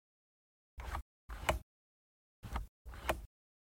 radio dial sounds for a button pack